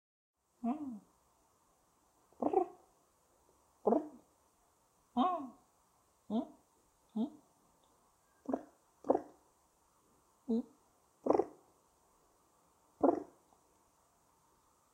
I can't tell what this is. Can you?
Small sounds that pidgeons do. Used for a personal work, mainly to complement some small actions of birds.